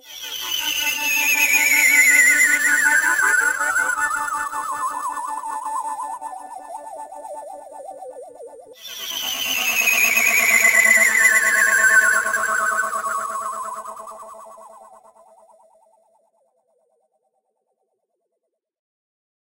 This is a very short clip i created using the ipad app Animoog.
This clip contains two consecutive tones intended to imitate some form of alien spaceship's engines.